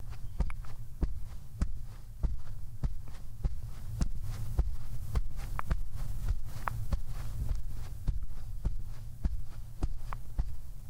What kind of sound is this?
Footsteps on Grass.L
walking on a grass surface